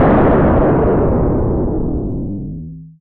An explosion handcrafted throught SoundForge's FM synth module. 1/7
environmental-sounds-research, explosion